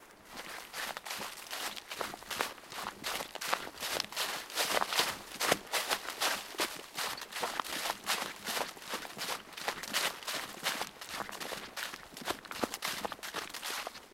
Footsteps / walking fast on wet gravel (2 of 3)
feet, foot, footsteps, gravel, ground, steps, walk, walking, wet